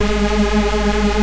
Juno High-Band
Classic rave noise as made famous in human resource's track "dominator" - commonly reffered to as "hoover noises".
Sampled directly from a Roland Juno2.
roland, juno2